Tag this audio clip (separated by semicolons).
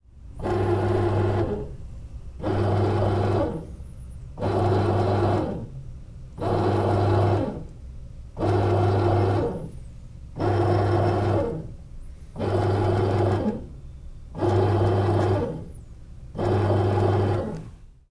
mechanic; Robot; movement; robot-movement; Servo; motion